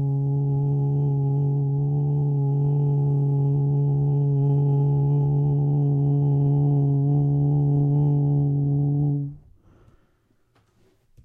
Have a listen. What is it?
GdlV Voice 2: D♭3
Unprocessed male voice, recorded with a Yeti Blue
human, male